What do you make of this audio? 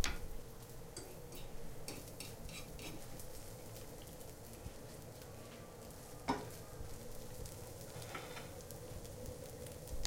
make omelete 3
This sound is part of the sound creation that has to be done in the subject Sound Creation Lab in Pompeu Fabra university. It consists on beating and egg.
omelette, beat